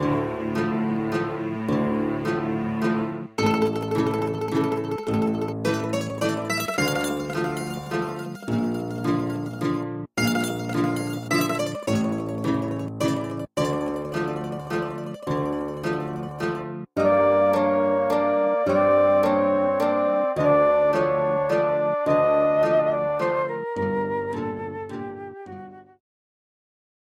Brief 27 seconds demo of "The Godfather Waltz" (Nino Rota).
Banjodoline is a Virtual Banjo and Mandolin VST, VST3 and Audio Unit plugin software, including a Mandocello, Mandola, Octave Mandolin, Banjolin and Electric Mandolin fretted stringed instruments emulation.
banjo, banjodoline, bluegrass, crosspicking, electric-mandolin, flute, folk, mandola, mandolin-audiounit, vsti